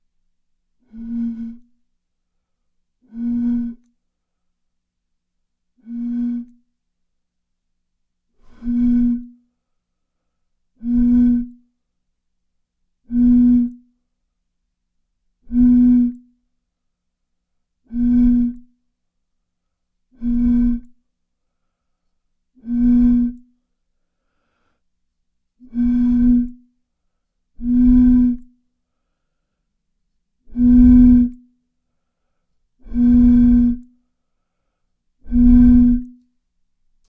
Blowing on the mouth of a Mich Ultra organic beer bottle. The last several unfortunately include my breathing into the mic.
beer, blow, glass